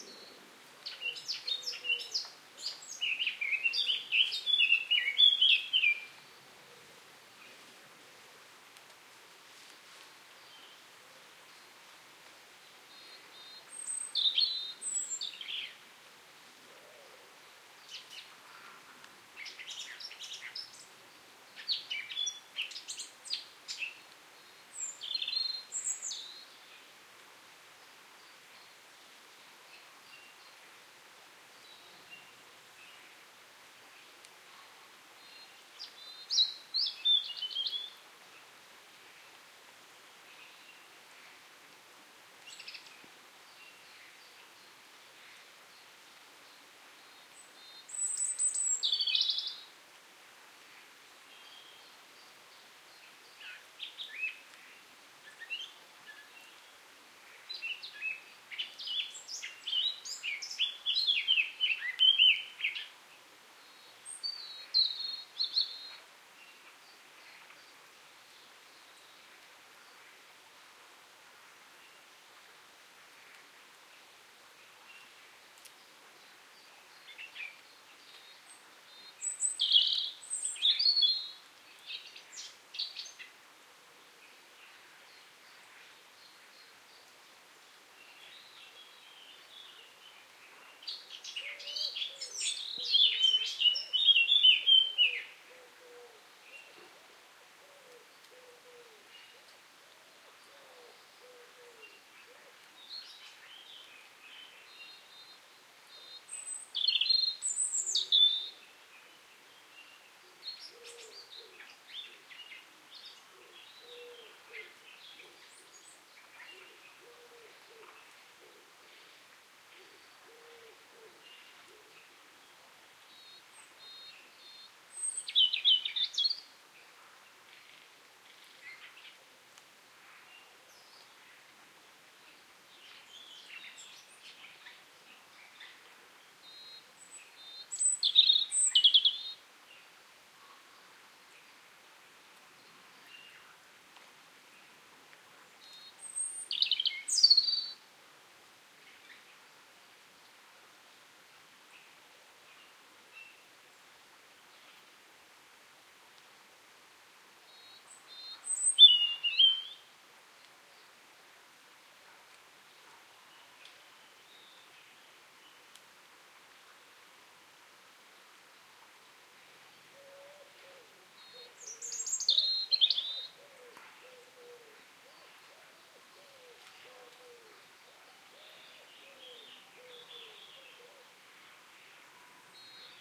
French Woods - Rainy Morning (take 2)
April 2018 at 9 in the morning, it's cloudy and a few small drops of rain are hitting the ground.
Location : South of France (Drôme Provençale) woods with a variety of birds.
recorded with a Tascam DR-40 with internal microphone in X/Y position + a Rode NTG-1.
denoised and mixed down.
ambiance, ambience, bird, birds, birdsong, cloudy, field-recording, forest, france, nature, rainy, spring